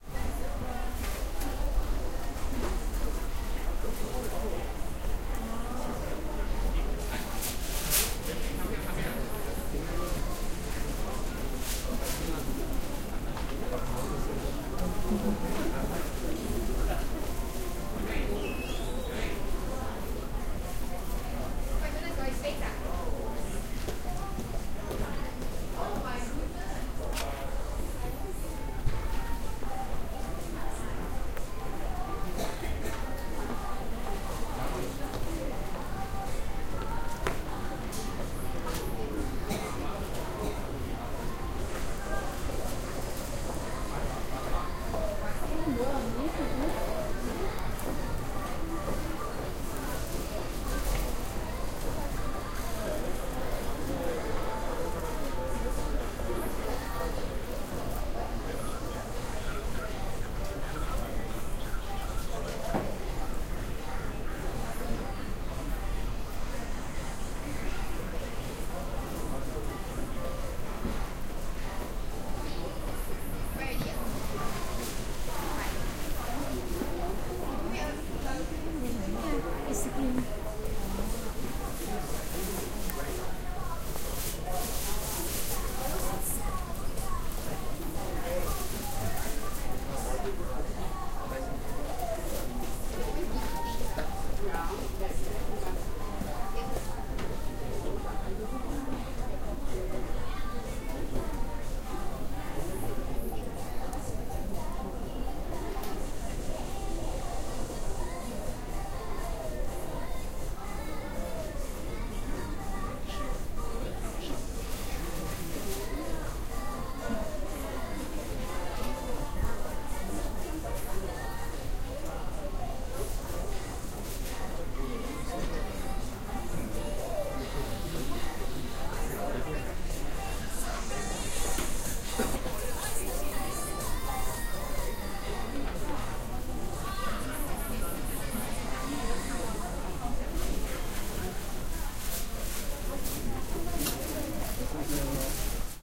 0126 Market souvenirs
Music and people talking, English and Korean, in the background. Shop for souvenirs
20120121
music, field-recording, shop, korea, seoul